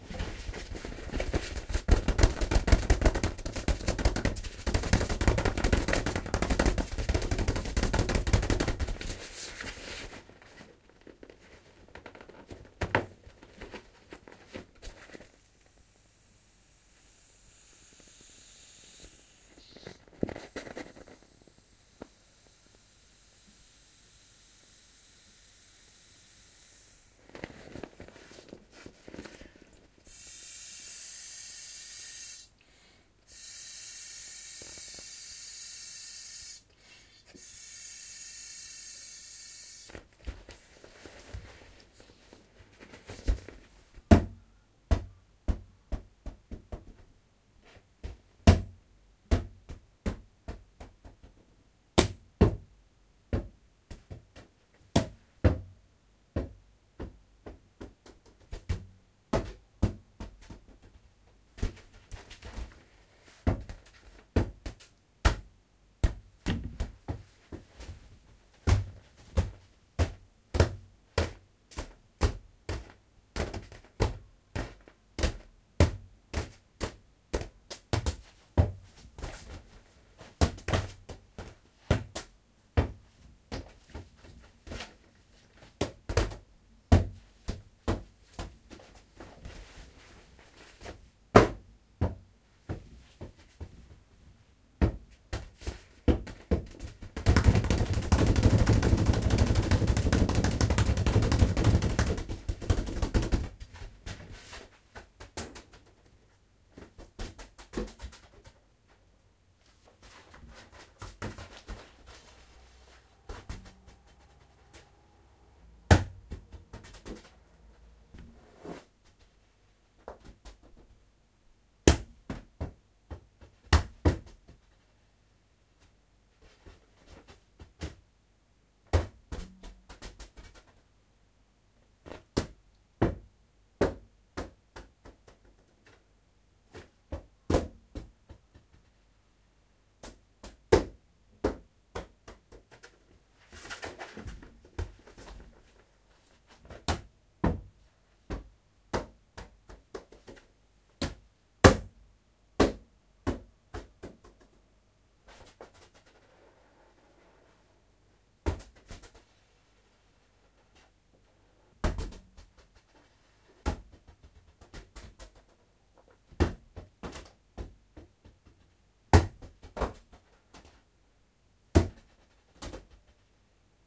Playing with the ball. hitting, kicking and swelling. Recorded with Zoom H1 build-in stereo microphones.
ball, hit, kick